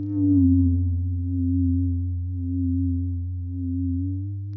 modified dtmf tones, great for building new background or lead sounds in idm, glitch or electronica.
electronica, glitch, idm, eerie